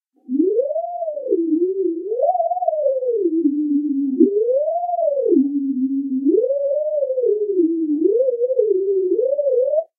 Created with an image synth program, these are modified images of brainwaves set to different pitch and tempo parameters. File name indicates brain wave type. Not for inducing synchronization techniques, just audio interpretations of the different states of consciousness.
synth, sythesized, image, brain